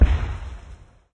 cannon boom5
A low distant sounding explosion.